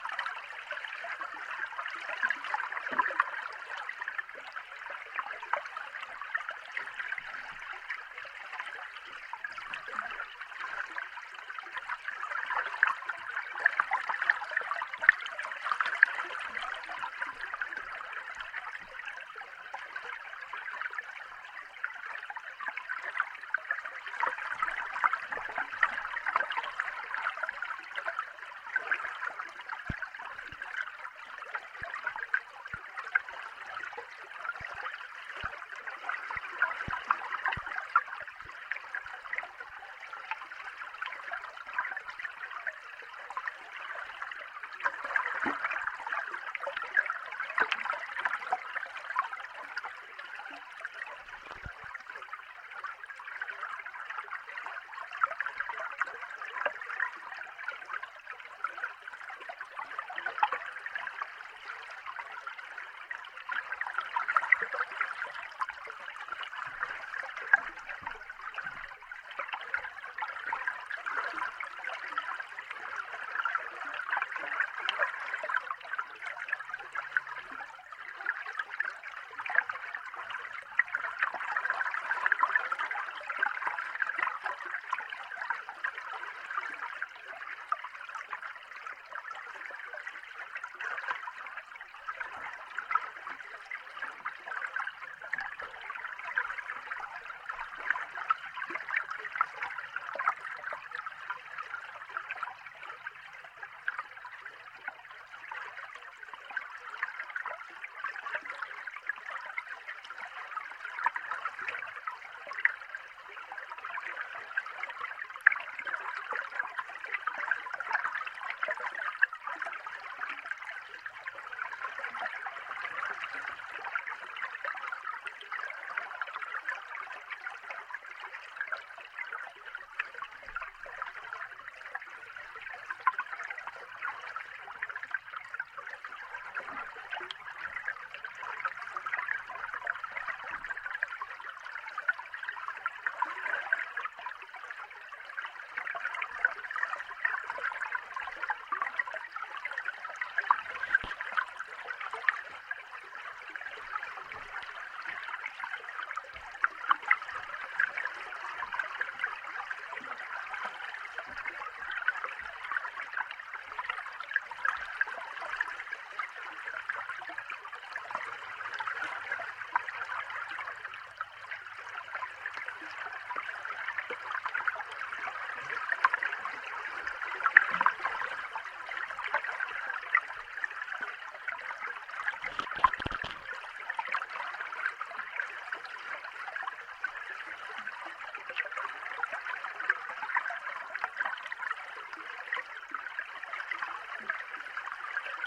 Raw hydrophone recording: Lake on a stormy day
Simple hydrophone straight into a Zoom H-5.
Cut and transcoded in ocenaudio.
field-recording, waves, raw, Europe, fieldrecording, bubble, water, nature, hydrophone, underwater, mono, Austria, outdoor, lake, wave